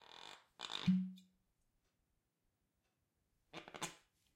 bottle cork in and out 2012-1-4
Taking the cork out and in of a bottle. Zoom H2.
bottle, Bottle-cork, cork, Dare-9, fub, glass, out, swoop